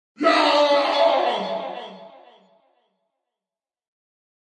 attack cannibal beast
Human creature screaming in attack.
alien, angry, attack, beast, cannibal, charging, creature, creepy, demon, devil, drama, fear, fearful, ghost, ghostly, gothic, haunted, horror, humanoid, monster, nightmare, scary, scream, sinister, spectre, spirit, spooky, terror, vicious, yell